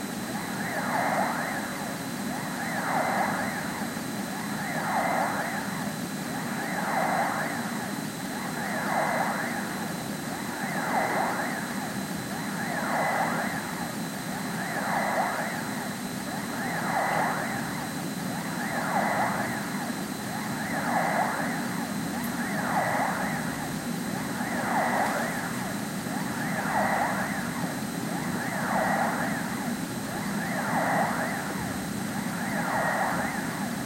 Close-up stereo recording of the noise my vacuum cleaner (iRobot Roomba 660) makes while charging battery. Primo EM172 capsules into FEL Microphone Amplifier BMA2, PCM-M10 recorder.